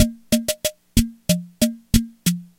Rhumba Drum Loop extracted from the Yamaha PS-20 Keyboard. If I'm not mistaken, all drum loops are analog on this machine